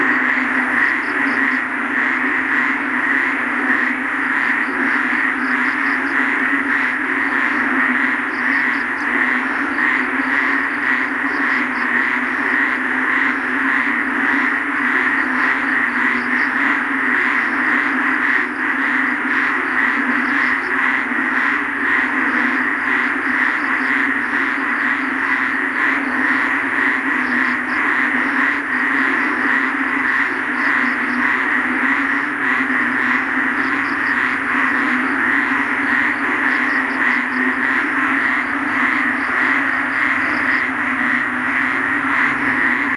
Frogs Deafening
Recorded with Canon S5IS. Ponds develop in the neighborhood during heavy rain, a few hundred meters from our house in Bgy San Jose, Puerto Princesa, Palawan, Philippines. Recorded at night.
field-recording, frogs